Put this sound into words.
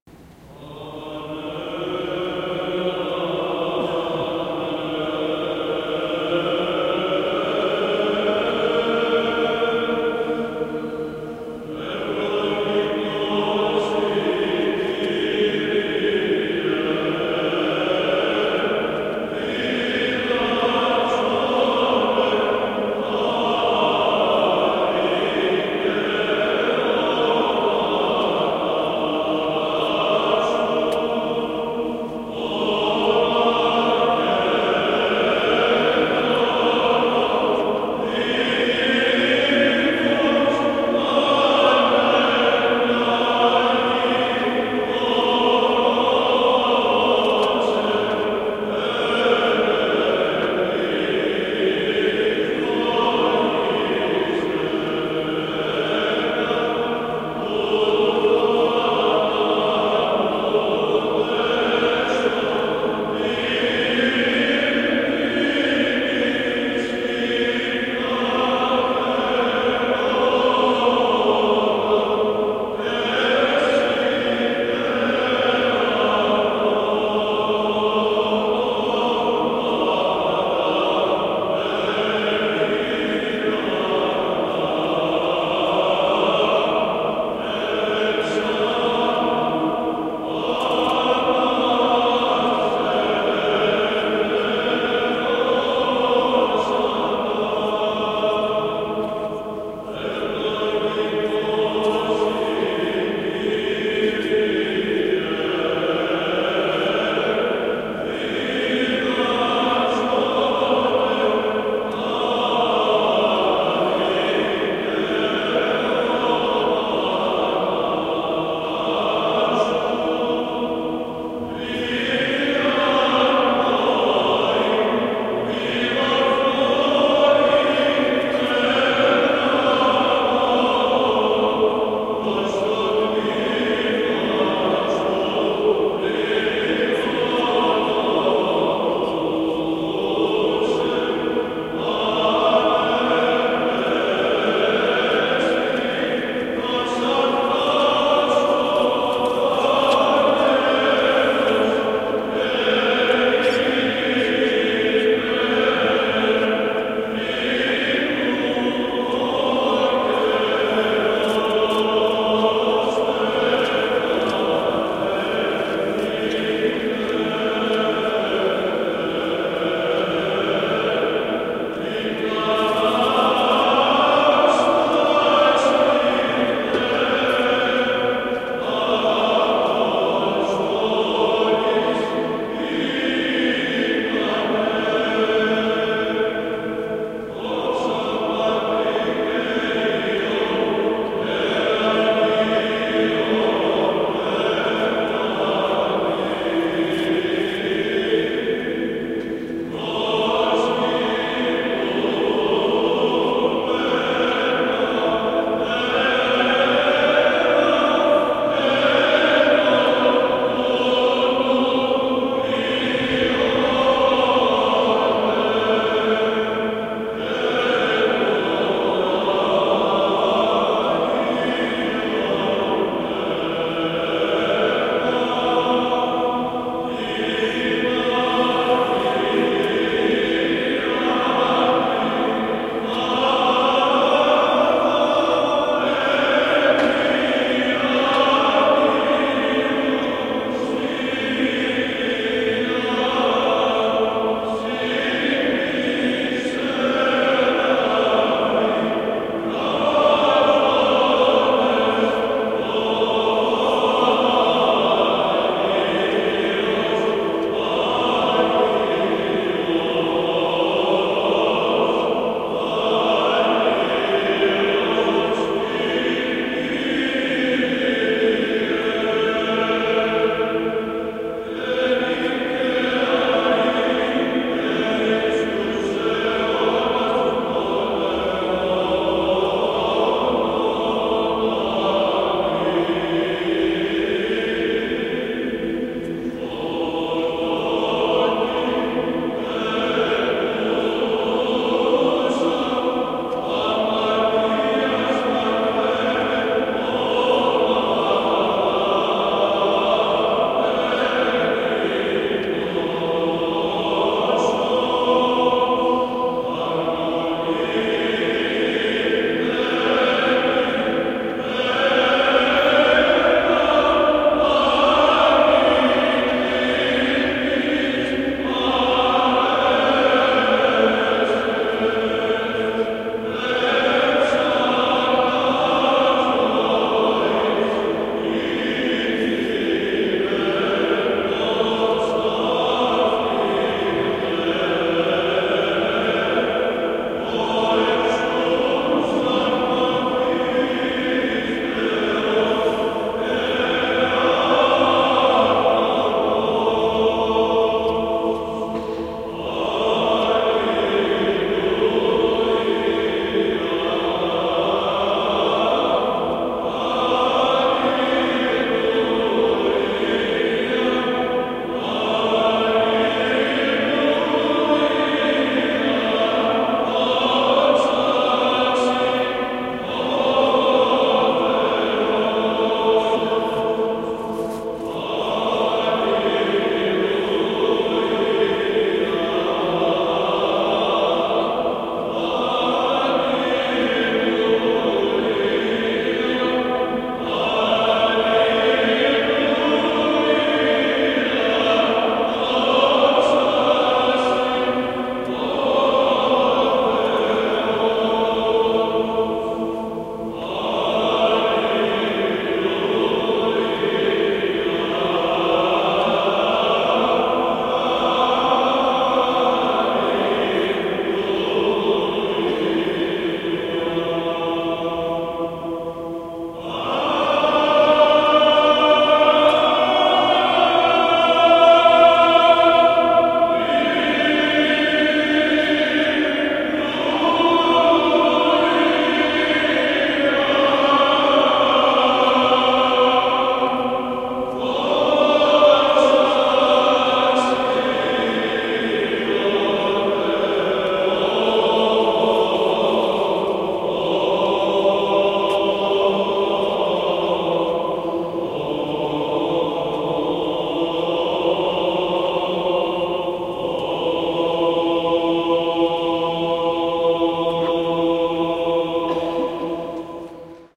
Byzantine Chant
Excerpt from a field-recording of Byzantine choral music made at the Cathedral of Saint John the Divine, New York City, as part of a performance entitled "Masters of the Psaltic Arts"
The vast space and stone walls make for deep resonances and reverb in the vocal
Mini-disc